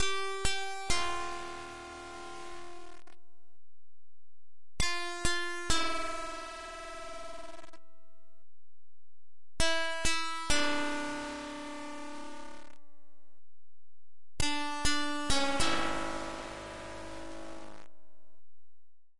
100 12 inch nails plucked gut 01
nails, organic, inch, melodic, free, sound, loop, plucked, gut, 12